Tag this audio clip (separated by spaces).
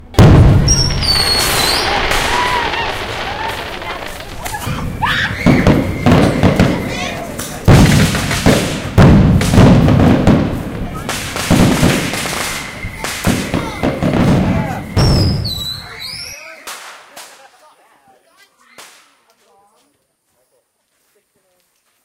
audiovisual leticia trilha haikai paisagem sonora